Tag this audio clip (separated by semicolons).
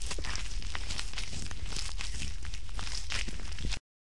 putty; goo; GARCIA; slime; Mus-152; SAC; live-recording